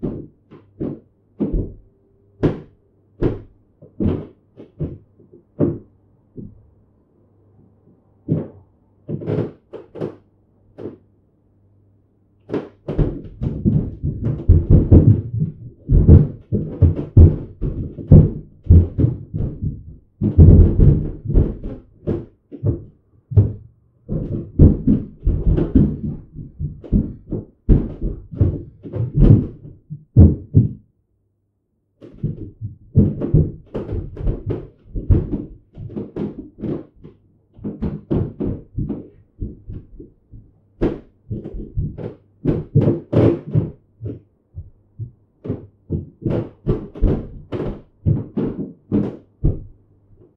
Thumping/fighting from upstairs
The sound of thumping and crashing, through a wall or ceiling.
Recorded for use on stage as the upstairs neighbours in a block of flats fighting, but could also be used to suggest banging around or general disruption from other tenants.
Recorded on a Zoom iQ7, then mixed to mono.
apartments, banging, fight, fighting, flats, footsteps, hit, noise, thump, thumping, upstairs